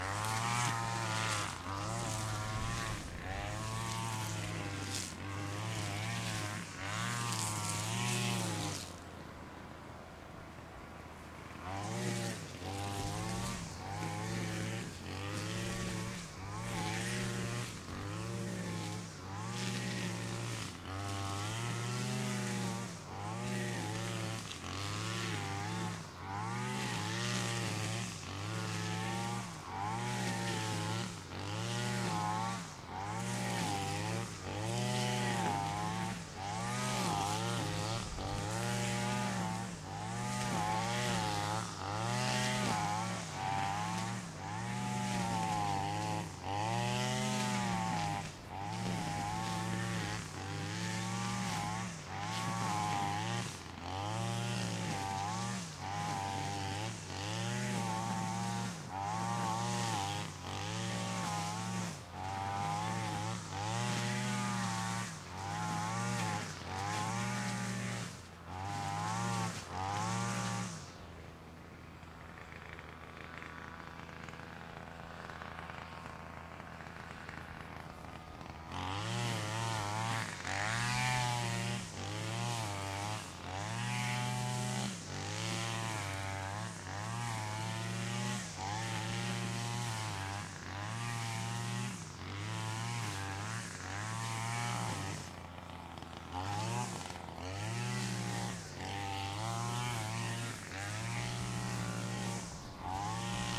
Lagoon ambience in summer, daytime. Someone is cutting grass with trimmer.
Mono.
Recorded with Sound devices 552, Sennheiser MKH416.
lithuania, day, grass, cutting, nature, lagoon, trimmer, field-recording, summer, ambience, seaside, lake